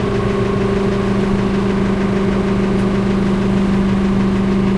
JCB Engine Medium Revs Inside Cab 2
electric, Buzz, Rev, low, high, Industrial, Mechanical, medium, Factory, motor, Machinery, engine, machine